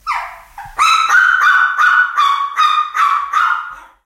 small dog
small, bark, dog, pet, dogs, barking